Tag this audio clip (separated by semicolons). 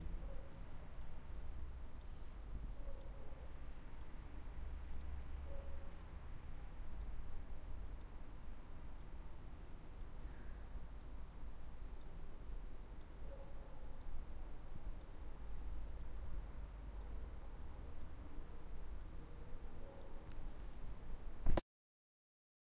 clock; noise; OWI; subtle; tick; tick-tock; time; watch; wrist-watch